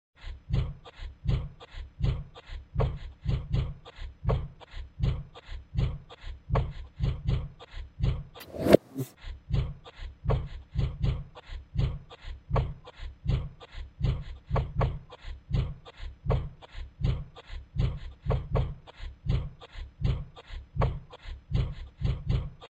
Sound of plunger with pitch lowered and repeated with a consistent tempo. Sound also has loud reversal of sound in the middle. Recorded on mac Apple built in computer microphone. Sound was further manipulated in Reaper sound editor.
chopped, experimental-audio, f13, fnd112, Plunge, reverse, tempo